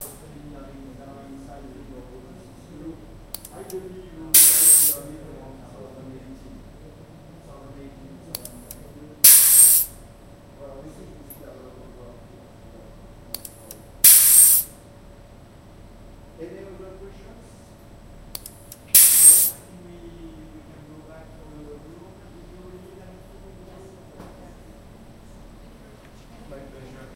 a valve in a scientific lab
lab, valve